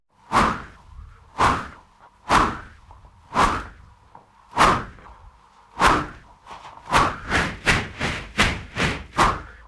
Airy Whooshes
Processed whoosh recordings for your motion graphic, fight scenes... or when you just need a little whoosh to you sound design :) Add reverb if needed and it's ready to go.
If you use them you can send me a link.
Whoosh, processed, powerful, heavy, Airy, fly-by, fast